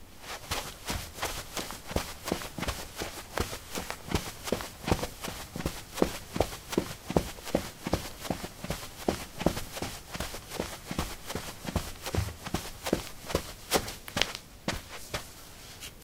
carpet 10c startassneakers run
Running on carpet: low sneakers. Recorded with a ZOOM H2 in a basement of a house, normalized with Audacity.
footstep, steps, footsteps